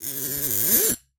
BS Zip 2
metallic effects using a bench vise fixed sawblade and some tools to hit, bend, manipulate.
Buzz, Zip, Rub, Grind, Scratch